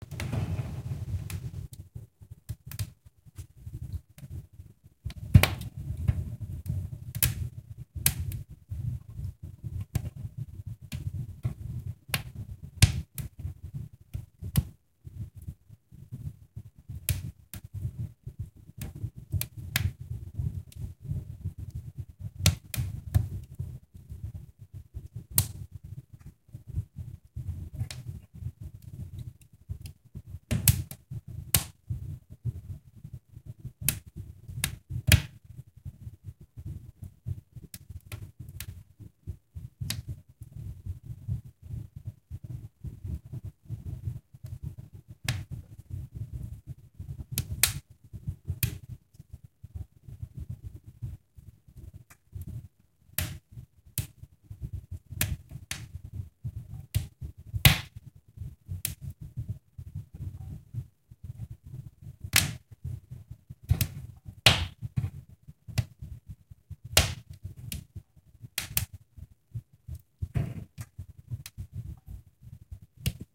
A slowly crackling fireplace
fireplace, fire, flames, crackle, crackling, burning, flame, burn